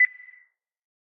Short beep sound.
Nice for countdowns or clocks.
But it can be used in lots of cases.
beep, beeping, bit, computer, counter, digital, h, hit, menu, select